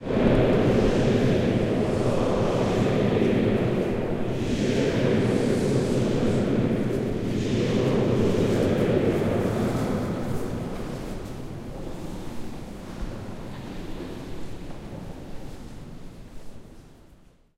massive murmur in cathedral
A massive murmur of a few hundred people in a big Gothic cathedral in Zagreb.